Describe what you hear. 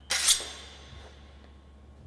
Sword Slice 03
Third recording of sword in large enclosed space slicing through body or against another metal weapon.